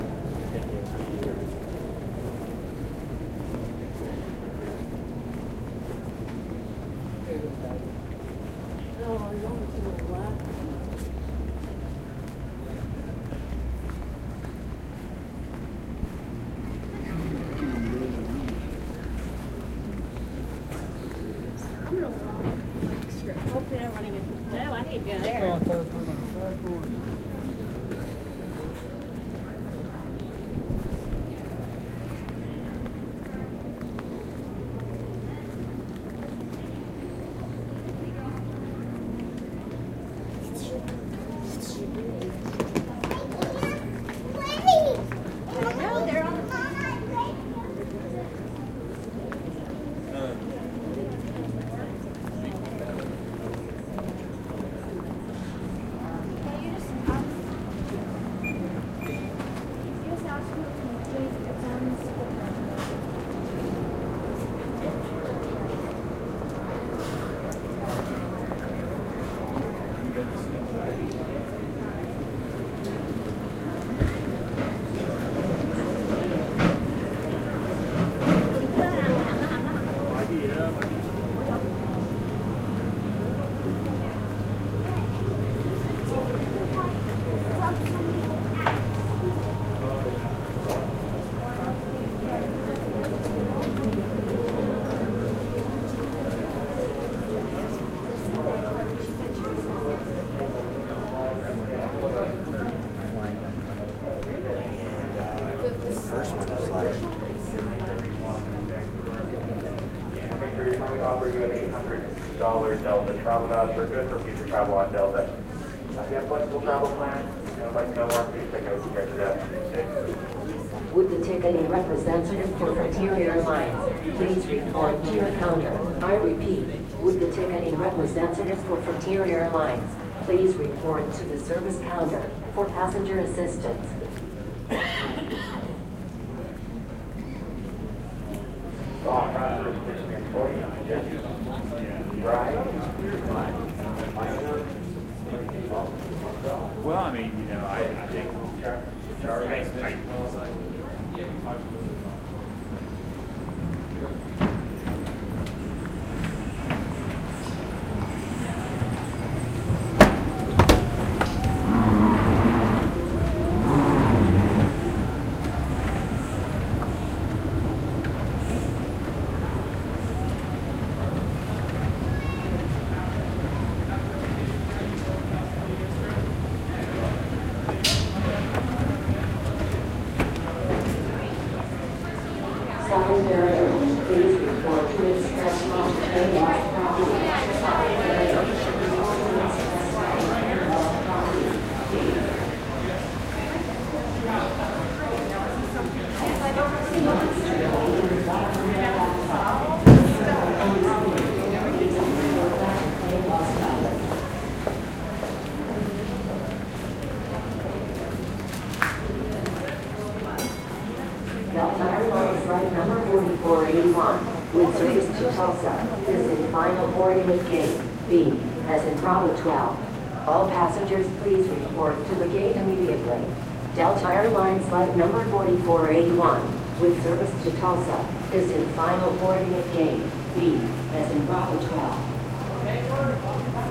Walking Through Salt Lake City Airport
This was recorded on July 23rd, 2016 while walking through Terminal B of the Salt Lake City, UT. airport. The segment includes the sounds of children passing by and talking, pilots and flight attendant conversation, sounds of going down a short escalator with a suitcase running along the bottom metal plate and make a sort of rubbing sound with the wheels. There is also a fair amount of the computerized voice talking overhead announcing flight and passenger information.
people-talking, atmosphere, people, background, field-recording, ambiance, travel, airport, walking